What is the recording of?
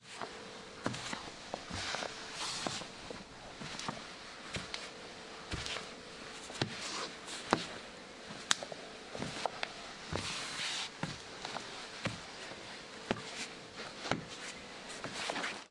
07-2 walk pavement, inside, slow
foot, pavement, sound